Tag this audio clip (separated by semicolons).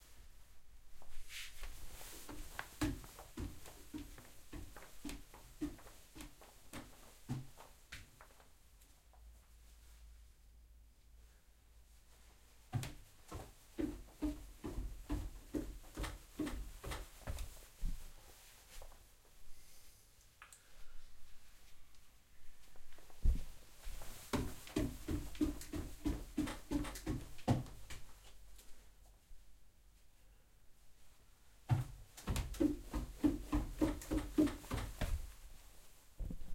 walk; walking